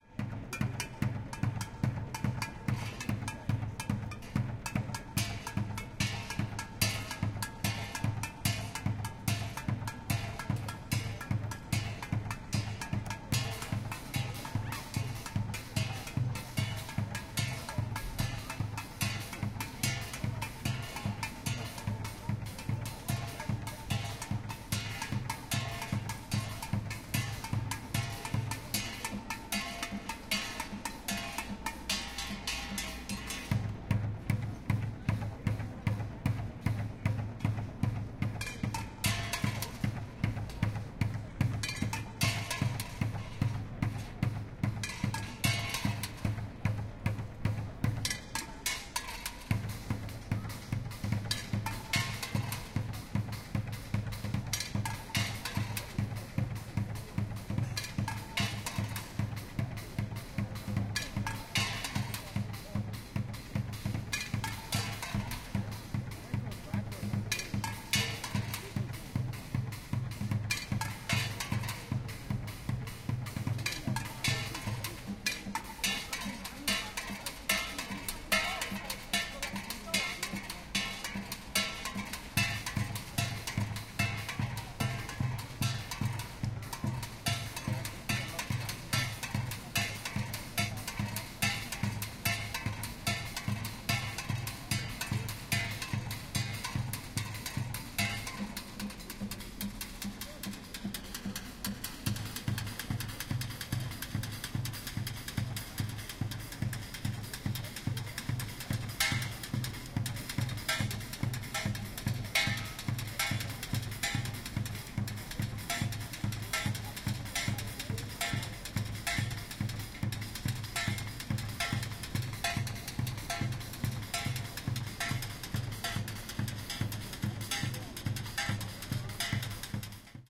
Street drummer recorded in Cologne
town, koln, soundscape, people, atmosphere, general-noise, field-recording, ambient, ambiance, noise, city, ambience, street, traffic, drummer, cologne